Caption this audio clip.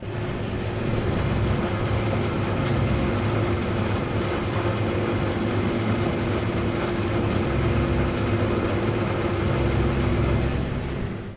This is a noise caused by the motor of a water machine